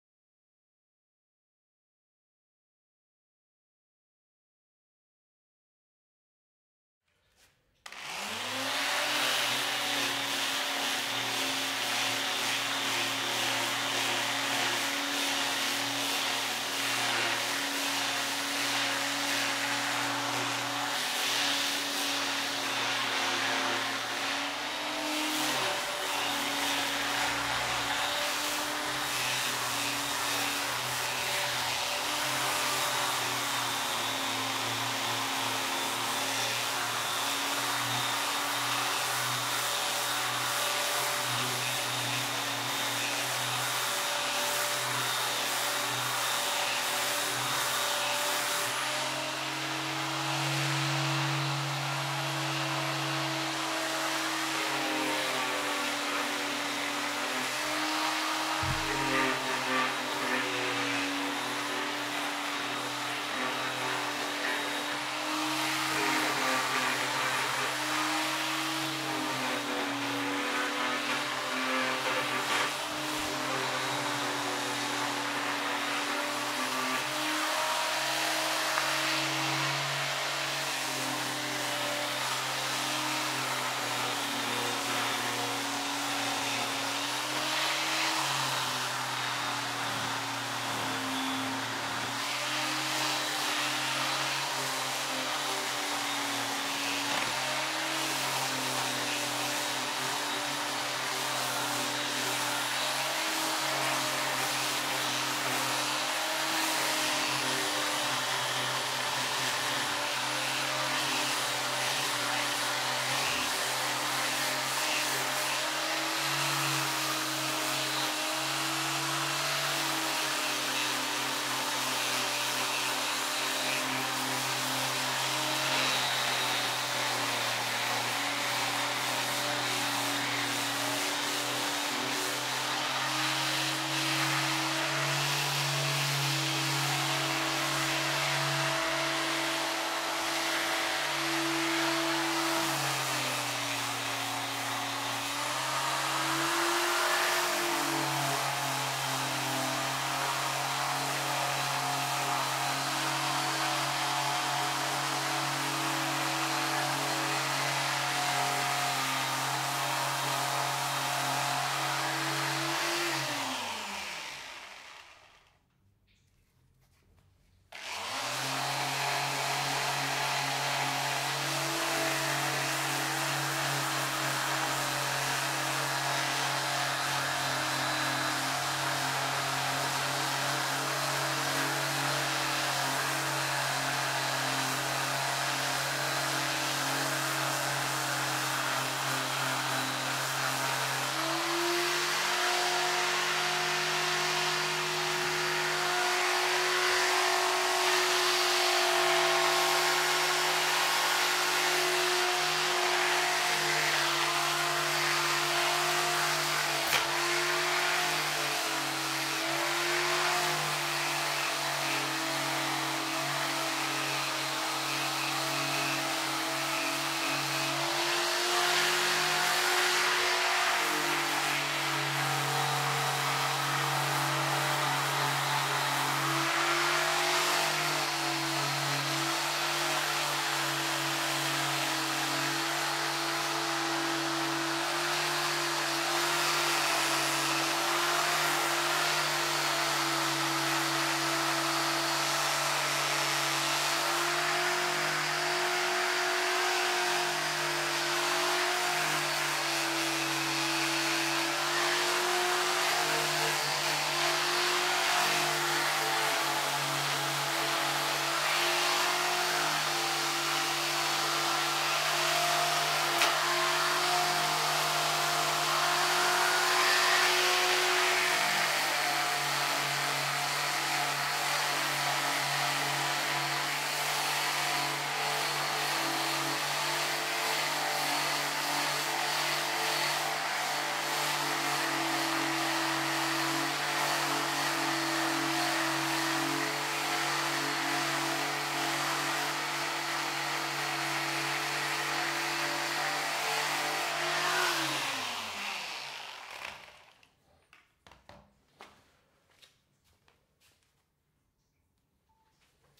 ElectricSander LongAction MediumSpeed 4824 22
Electric Sander start/stop and sanding at a medium speeds.